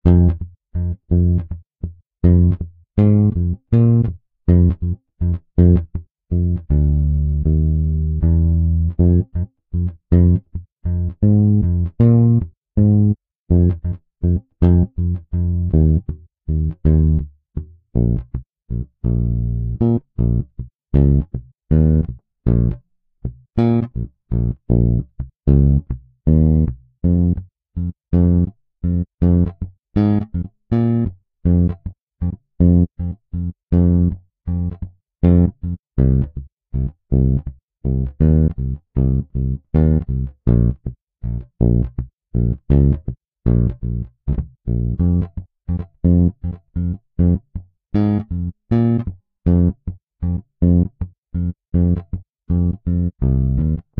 Song7 BASS Fa 3:4 80bpms
HearHear, Piano